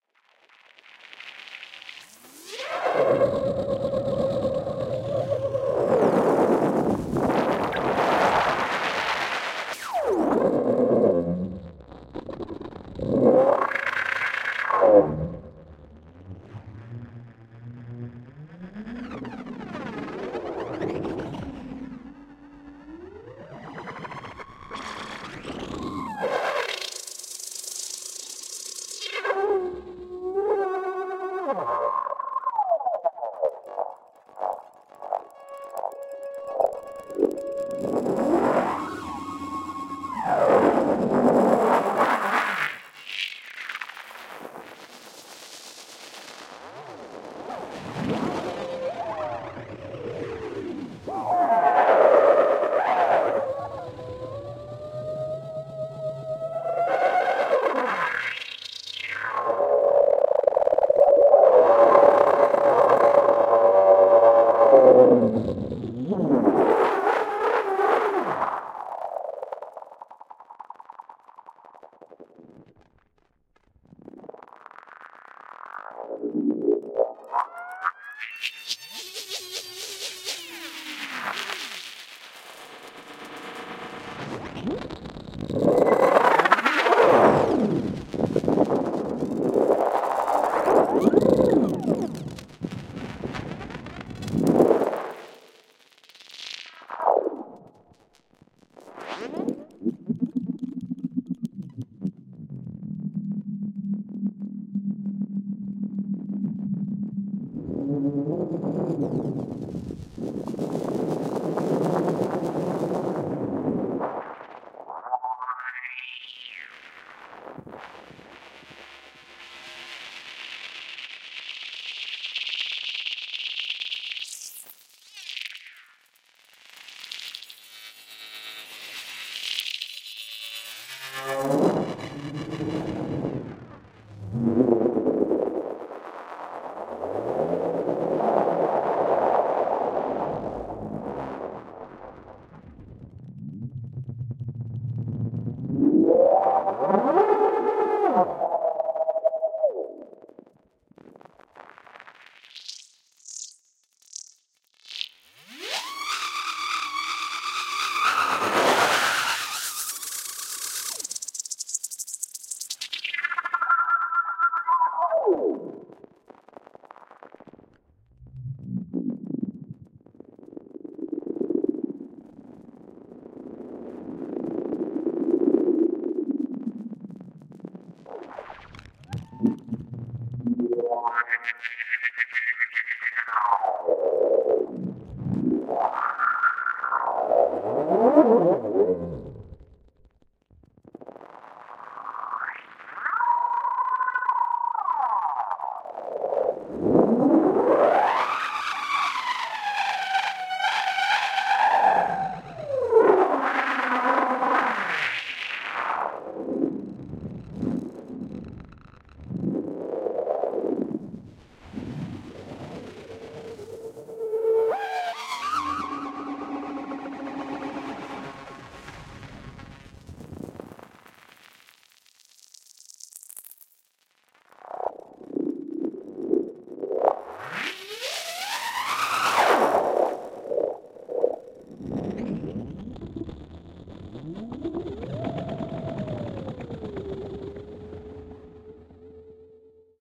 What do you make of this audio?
ESERBEZE Granular scape 39
16.This sample is part of the "ESERBEZE Granular scape pack 3" sample pack. 4 minutes of weird granular space ambiance. Frequency and filter sweeps with some LFO's on them.